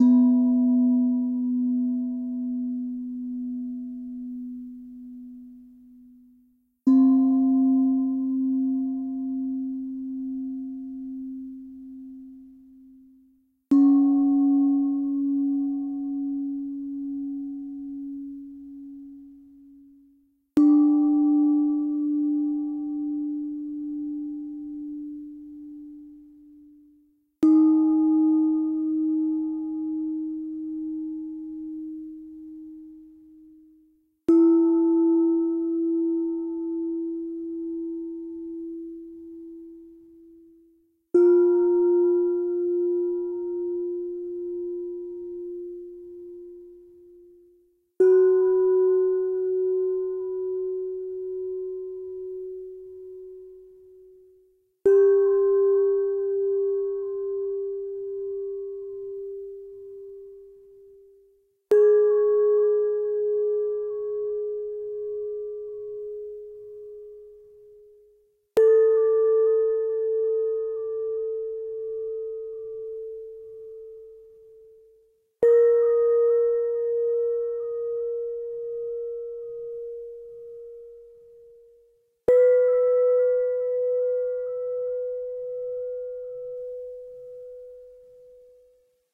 Zen Gong (Scale B)
This is a sample of a metal kitchen mixing bowl. The first note (B, not perfect B) is unpitched and recorded as is. The following notes are the original note pitched up 1 semitone each time to achieve a 13 note scale B to B.
ambient, B, bell, bong, bowl, chilled, ding, dong, gong, metal, Natural, notes, peace, peaceful, percussion, scale, zen